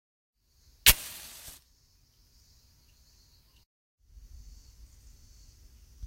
Match striking
Raw audio of a match being struck. It can be heard flaming after the initial strike.
light, flame, strike, matches